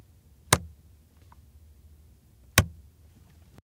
pressing hard button